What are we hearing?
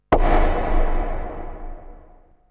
industrial low clap08
industrial low clap
clap, industrial, low